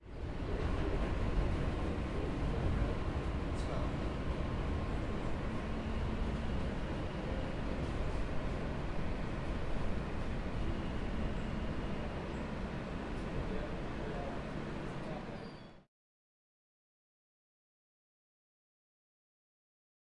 Ambiance NYC subway train, in transit screeching